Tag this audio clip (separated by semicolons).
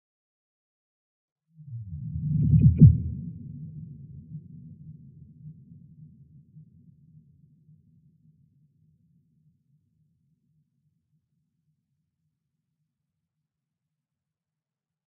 efect; foley; vaso